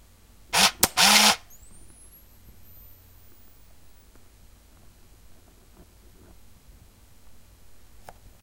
camera with flash
sound film camera flash recharge